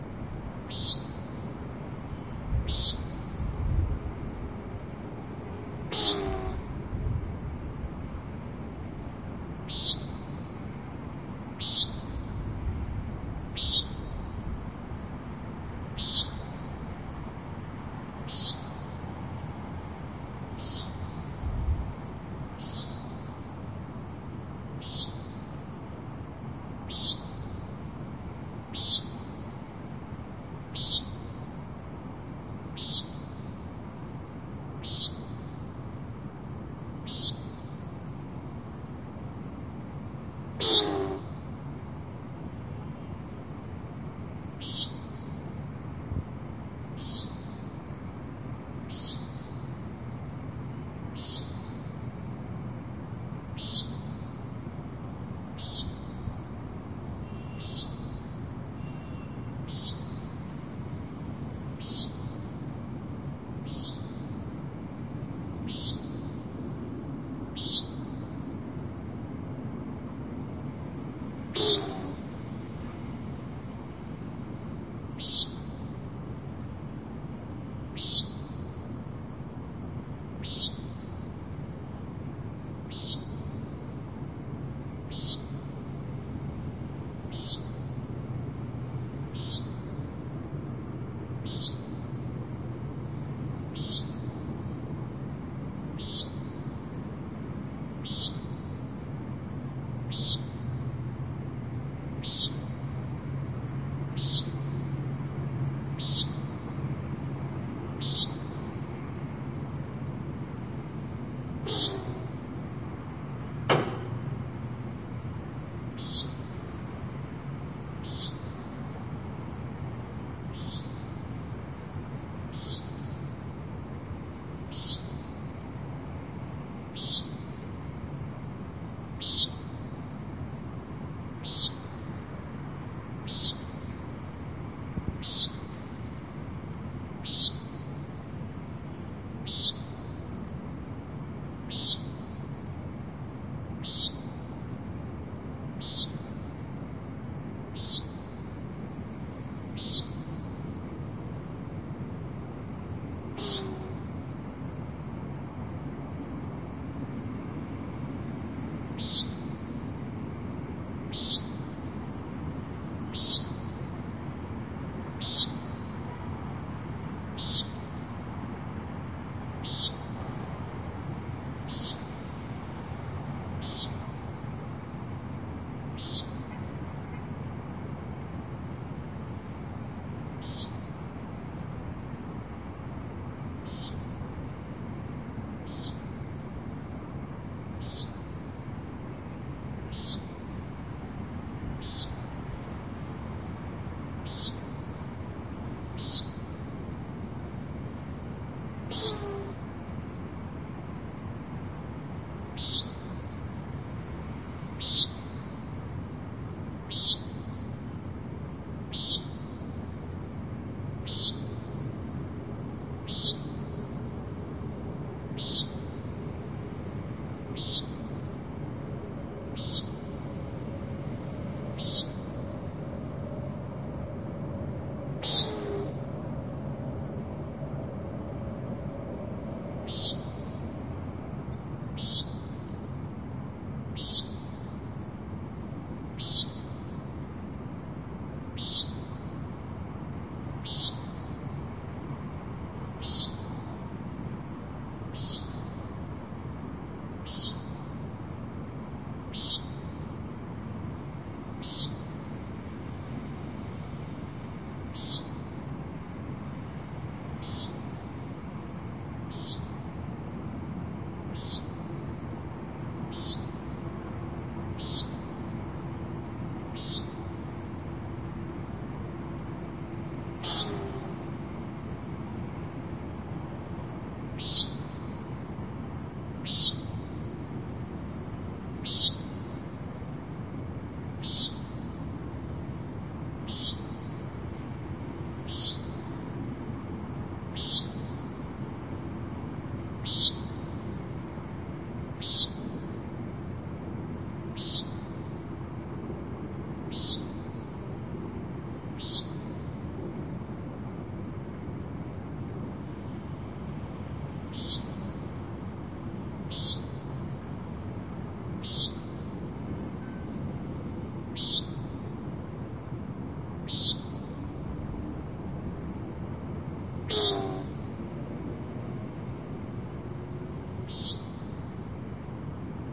Damn Crazy Bird
Every year at this time (end of May), this crazy bird starts flying circles around my building, making its distinctive calls. I made this recording in Cambridge, Mass., USA on May 30, 2013 with a Zoom H2. I went up on the roof and held the recorder with the mic pointed upwards. In addition to the bird calls, you can hear the occasional zooming sound as the bird zips by overhead.